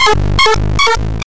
Generated in SFXR, edited in Audition. I altered the original sample with a pitch shift over time. It sounds like a bomb charging.
bit, charge, sample